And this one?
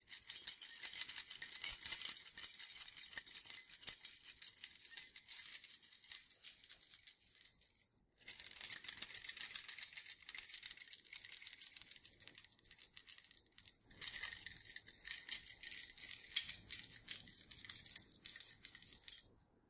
Keychain Movement
A key chain ringing whilst being moved away from the camera, 3 takes.
chain, chains, iron, key, metal, metallic, moving, moving-away, ring, ringing, transition